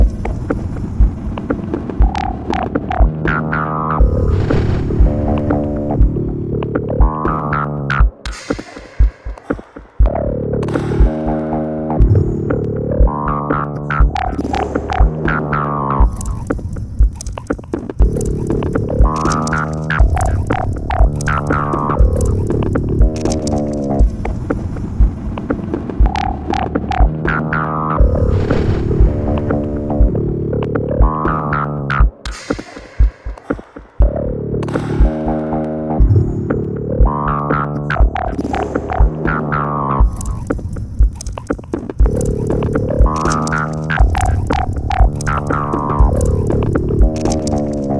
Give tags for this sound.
Novakill; dare-39; ambient; dark; bass; ennerving; moody; loop; dare-37; Nitrous; synth

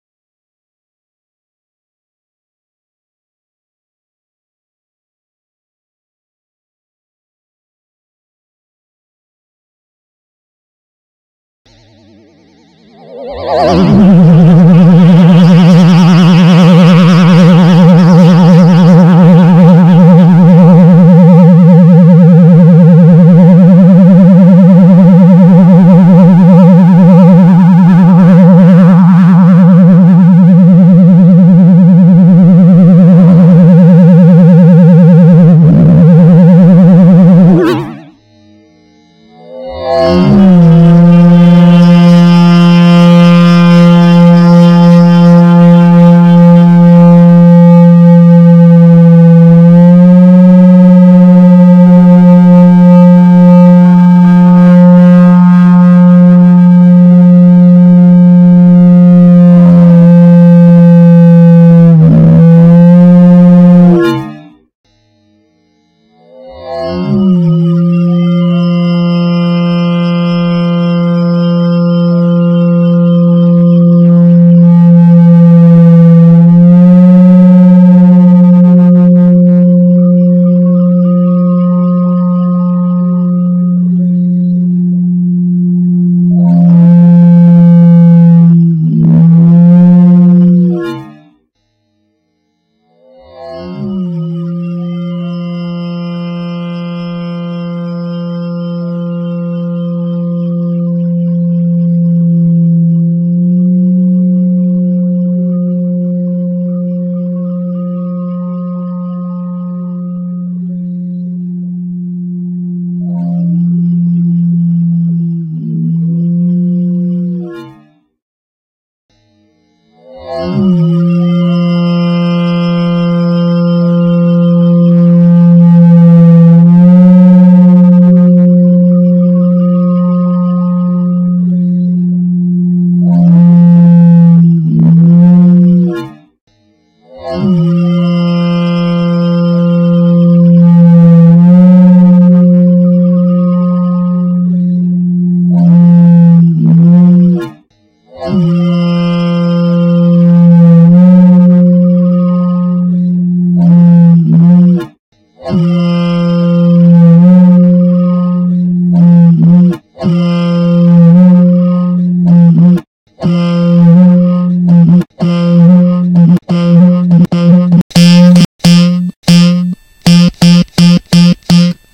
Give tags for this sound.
clic; experimental; ping; pong; snap; spoing; strike; struck; vibrating

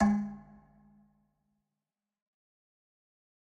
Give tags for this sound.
drum; home

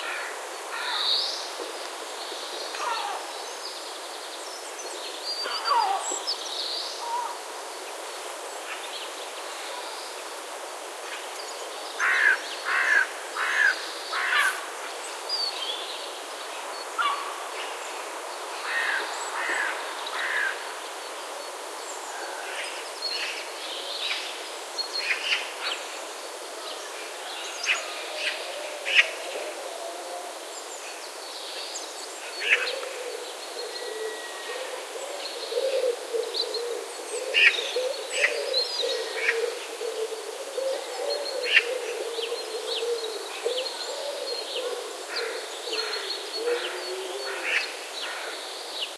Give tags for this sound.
birds; forest; through; walk; wind; woods

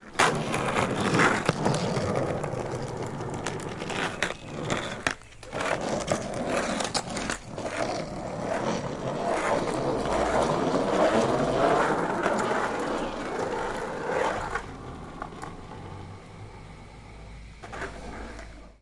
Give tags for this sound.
road; birds; skate; asphalt; long-board; pass

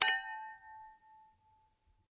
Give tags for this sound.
wine-glass; clinking; wine; glass; glasses

